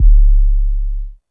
C#1 808 Kick Drum